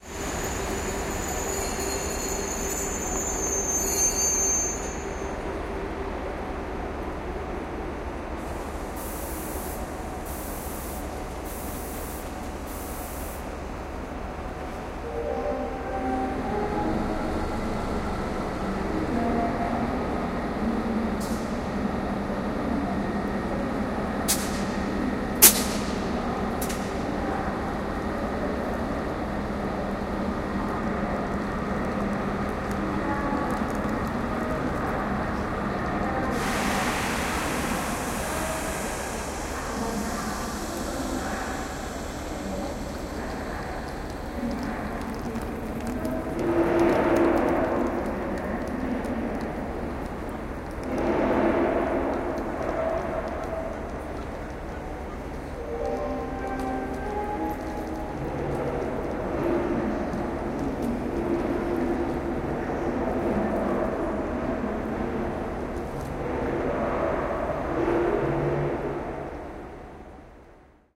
train station france(bordeaux)
A-B recording. Bordeaux (France) train station.
ambience, train-station, sncf